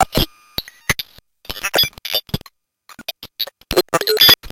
This is a short sample of some random blatherings from my bent Ti Math & Spell. Typical phoneme randomness.